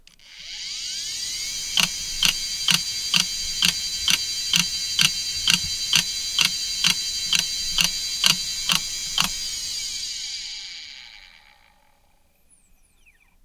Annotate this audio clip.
WD Protege BB - 5400rpm - BB
A Western Digital hard drive manufactured in 2000 close up; it's broken, so you'll hear the click of death.
(wd 100 - 2000)